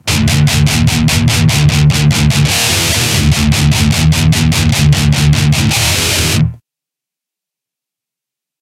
DIST GUIT 150BPM 8
Metal guitar loops none of them have been trimmed. they are all 440 A with the low E dropped to D all at 150BPM